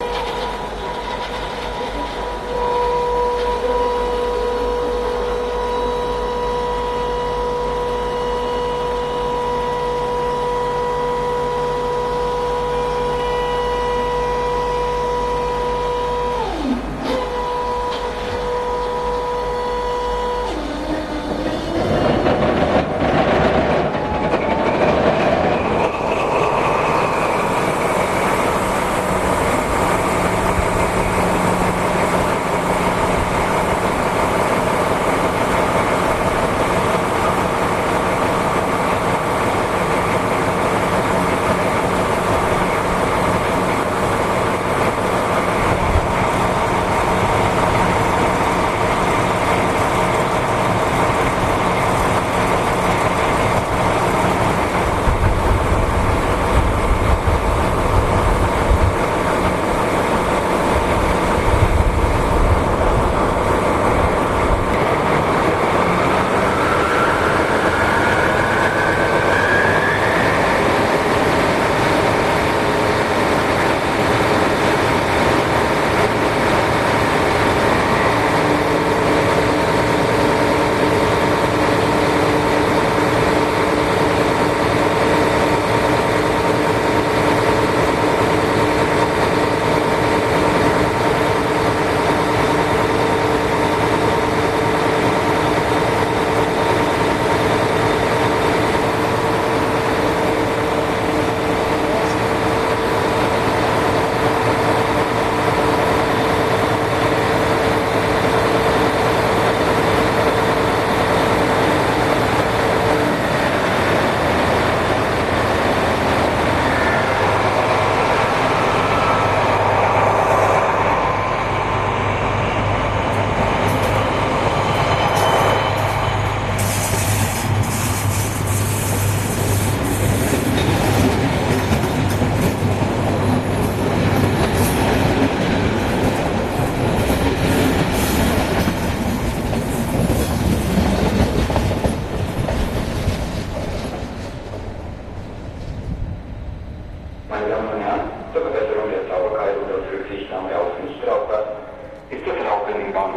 the start sound of an Engine of the 218-Diesel Train.
i recorded it with the sony mz710 Mini disk recorder and the sony ecm907 microphone.
recorded by "Tonstudio Das-Ohr"